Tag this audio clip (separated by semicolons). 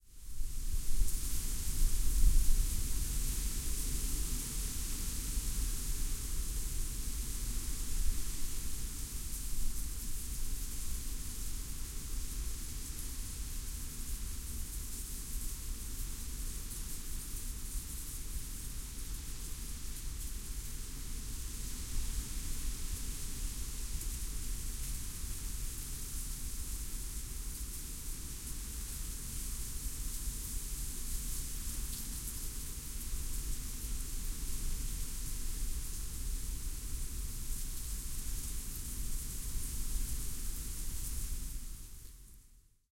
autumn; blows; dry; leaves; sidewalk; wind